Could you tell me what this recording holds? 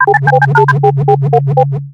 It's a mix of two DTMF tones with different duration, but the same tone/silence ratio. With lower amplitude, there is a sinus wave of 140Hz with wahwah effect.